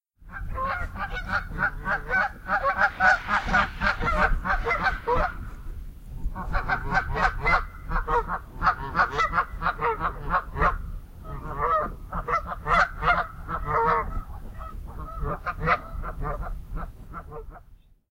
Canada geese flying down and landing near a lakeshore. Recorded with an Olympus LS-14.